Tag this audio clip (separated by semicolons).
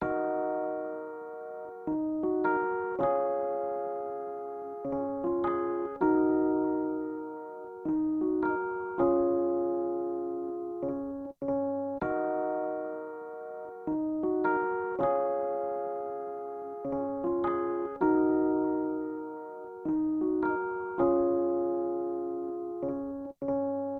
lo-fi; sample; loops; melody; loop; Epiano; piano